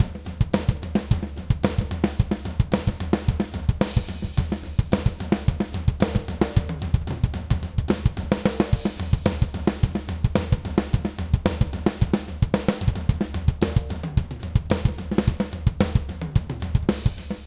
rock groove 110 bpm
Rock groove with toms 110 bpm
loop, drum, rock, rhythm, rhythmic, acoustic-drums, 110-bpm, beat, drum-loop